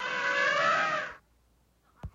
elephant animal sounds
animal, elephant, sounds